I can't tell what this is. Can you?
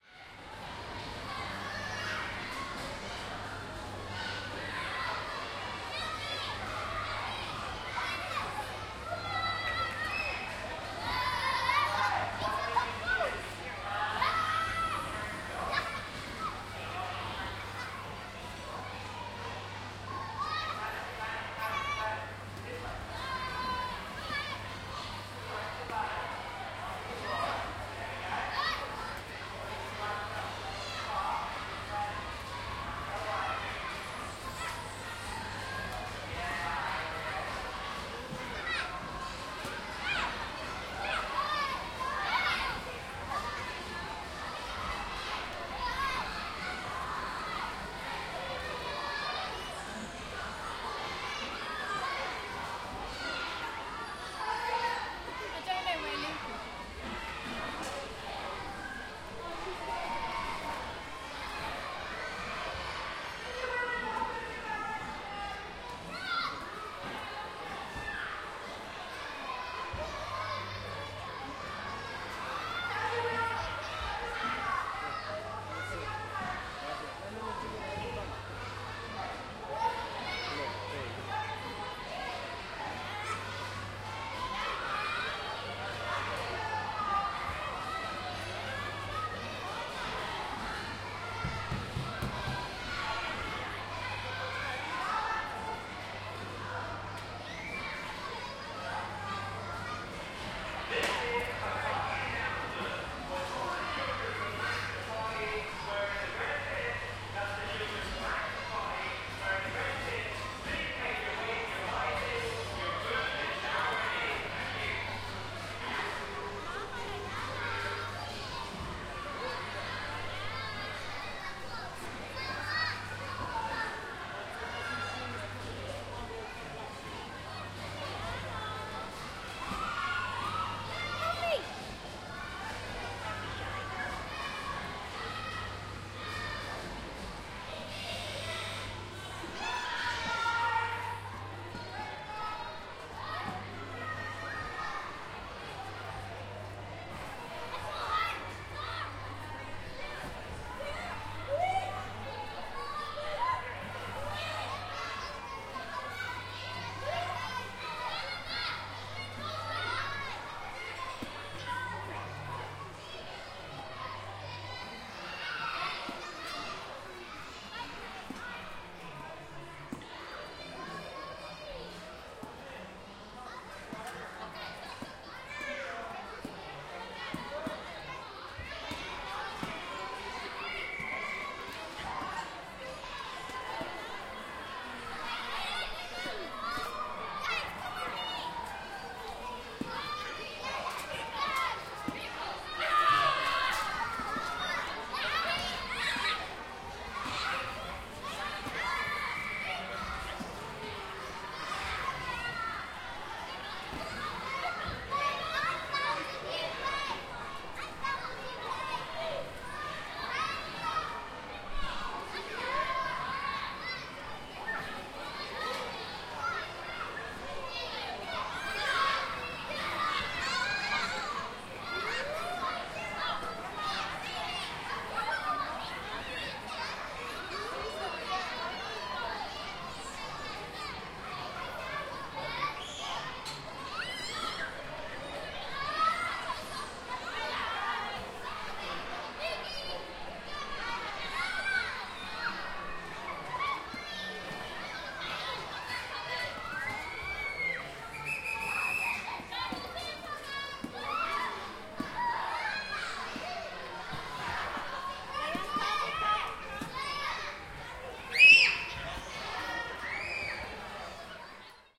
Children playing in an indoor play centre, occasional adult voices, buzzer sounds, person through megaphone
Children, indoor, play-centre